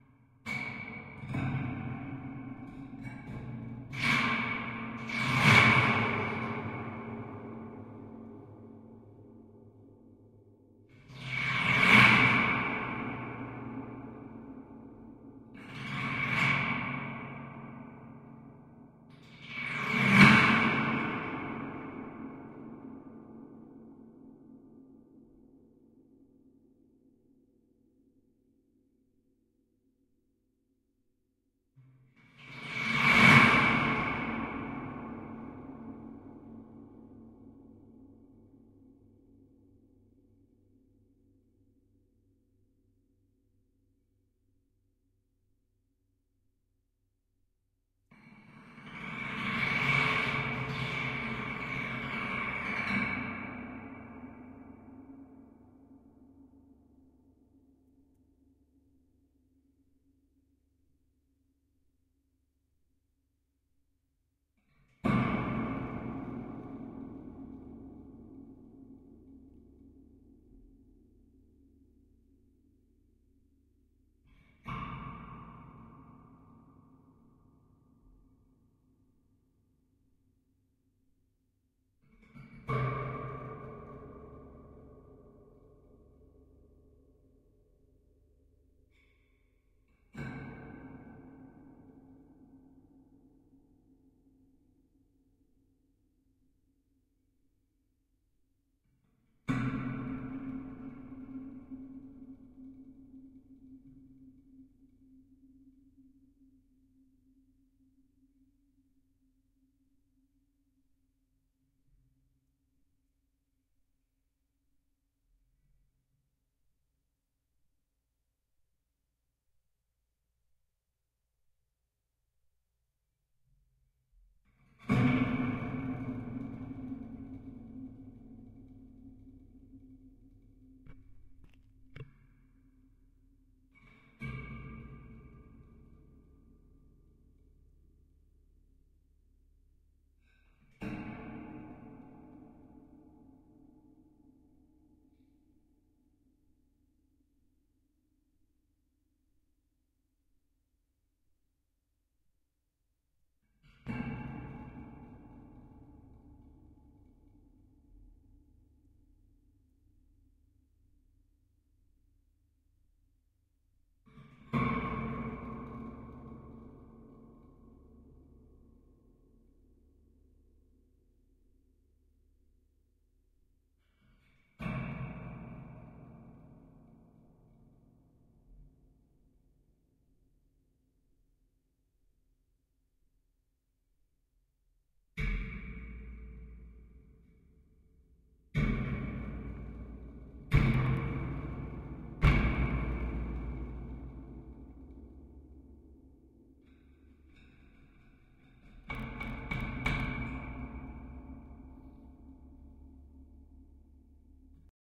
Picking at a Giant Fan
This is the sound of a contact mic attached to a giant fan while I picked on the grill. It's pretty crazy and dark-sounding. Zoom H4N.